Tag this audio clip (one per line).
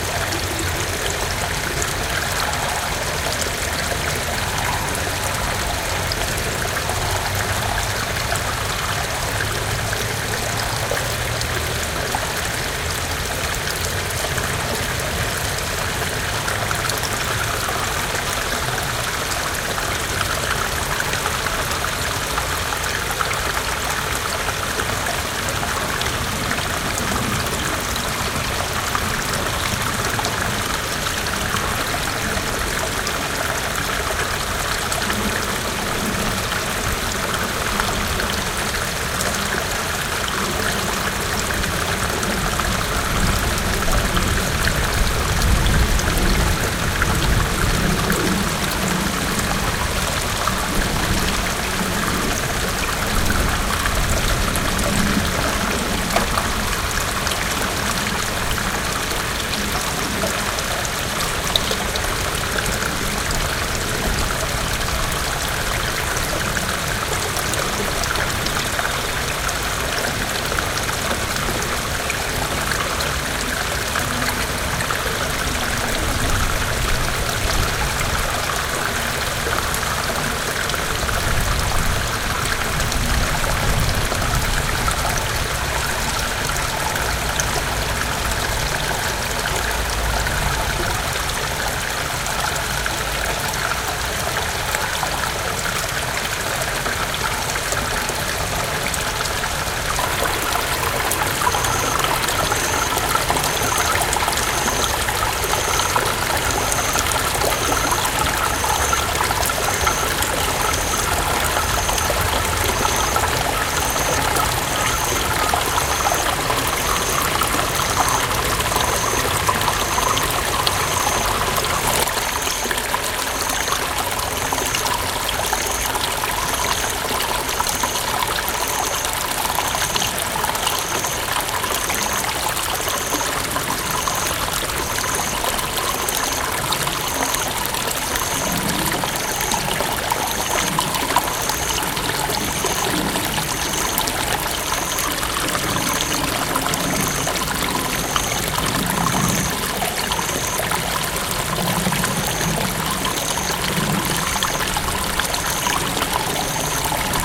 tunnel
river
town
field-recording
water
wind
movement
water-stream
riverbank
japan
tunnels
summer
matsudo
village
splash
winding
chiba
october
reverberation
blowing
crickets
waterside
streaming
autumn
stream